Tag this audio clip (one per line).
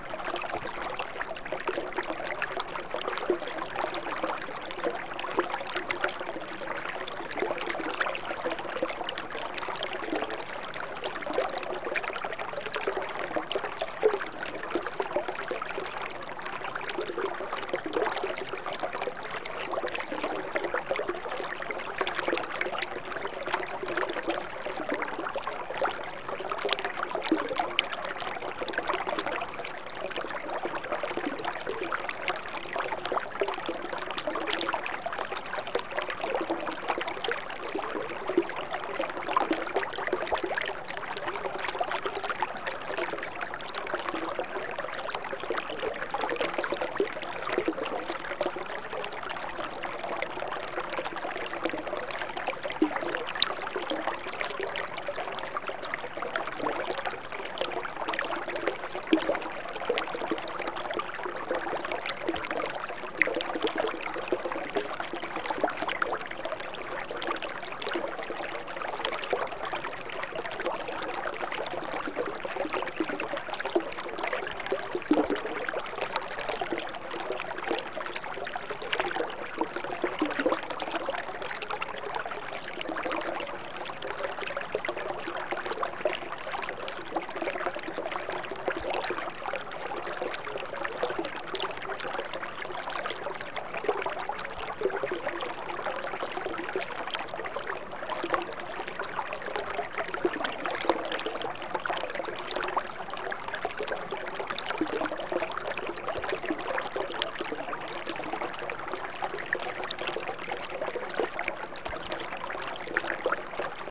river
water
stream
flow
relaxation
loop
trickle
ambient
noise
dribble
field-recording
relaxing